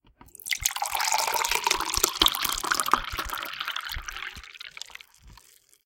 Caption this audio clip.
Liquid Fill Glass Long
drip, fill, glass, high-quality, liquid, pouring, slow, water